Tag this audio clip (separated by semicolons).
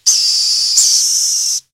film; science-fiction